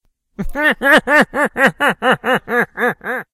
Dummy Laugh-Voiced
This is a laugh I recorded of my own voice that was used in a play Production.
Puppet, Silly, Creepy, Laugh, Dummy